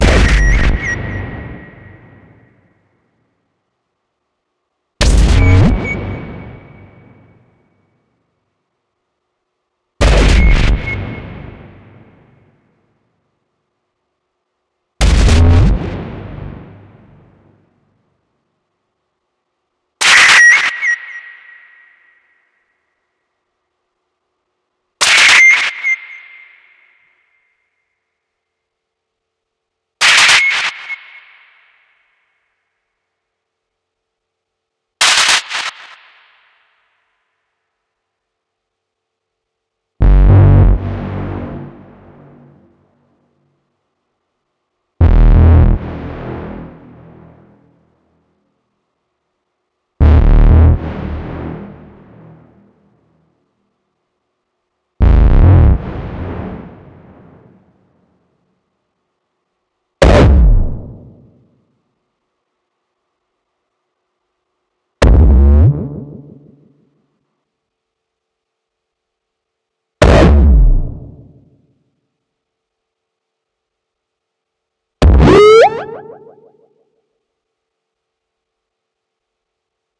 12 analog sounding impacts. Made with Monark & Dub Machines in Ableton Live.